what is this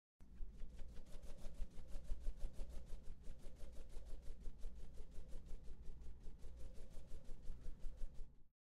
30-boomerang volando-consolidated
audios de la etapa 2 de la materia audio 1 , estos audios fueron grabados para el clip "the wish granter"
envyroment, foley